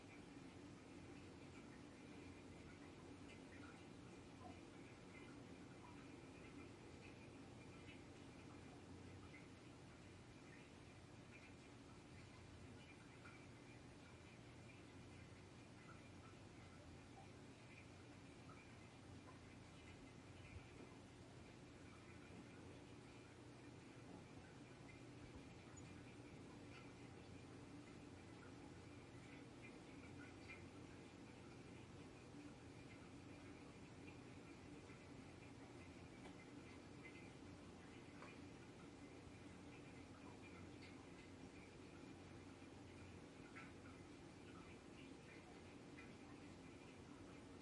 Half bathroom with some toilet noise
Roomtone, small half bathroom in a basement apartment with toilet noise